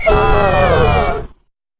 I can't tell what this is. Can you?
Woah, that is annoying... It's basically the sound of King GalaSpark groaning, which I edited. Kingsley GalaSpark often makes that sound when he's upset or injured.
*Weird!*
aargh, alien, annoying, eerie, groan, groaning, King-GalaSpark, male, ugh, vocal, voice, weird